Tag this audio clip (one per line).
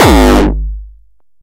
drum
2
kick
gabber
hardcore